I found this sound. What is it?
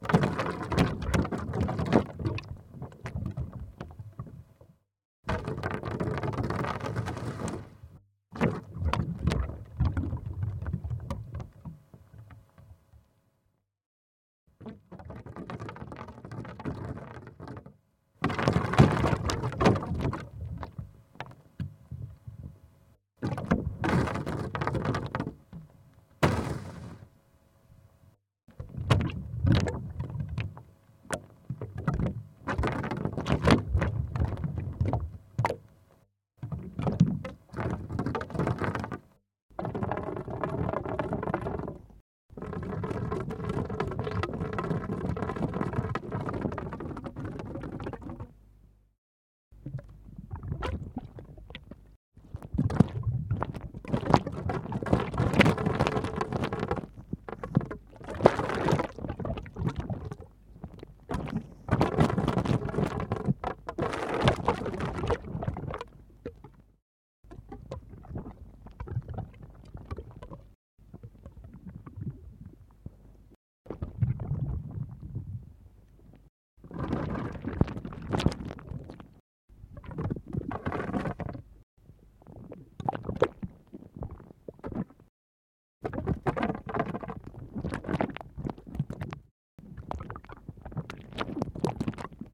Water and bubbles pressuring through tube
Water being pushed back and forth through a tube interacting with air bubbles to make weird sounds.
For the geeks:
pipe, gurgle, drain, bubbling, thick, stream, water, blood, bubbles, flow, fluid, liquid, tube, gargling, pressure, air